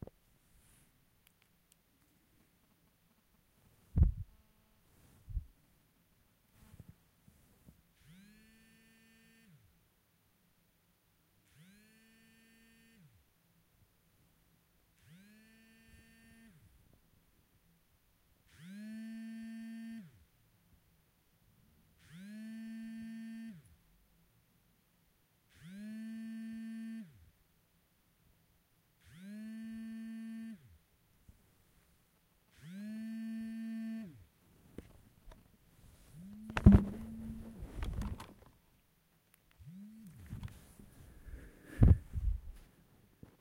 Cellphone / Smartphone / Mobile Vibration with and without interferences
Recorded with Olympus LS 14 under a blanket and on a piece of wood.
alert, cell, cellphone, handy, mobile, phone, Smartphone, vibration